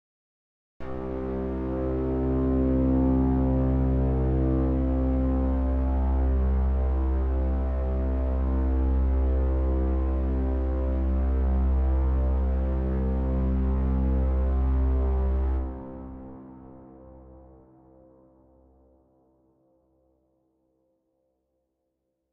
A synthesised cello sound - played and held at midi note 48 C - made in response to a request from user DarkSunlight.